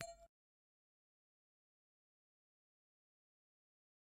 Heatsink Small - 17 - Audio - Audio 17
Various samples of a large and small heatsink being hit. Some computer noise and appended silences (due to a batch export).
bell, heatsink, hit, ring